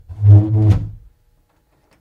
Wooden Door Open Opening